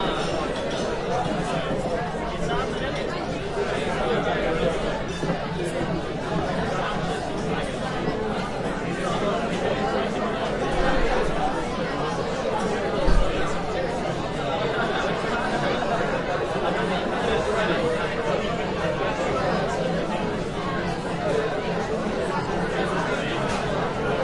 Busy bar ambient sound
Ambient sound of a busy university bar or restaurant at lunchtime. Recorded with a Roland R26 in OMNI settings. Edited with Pro Tools 10.
ambient, bar, busy, restaurant, sound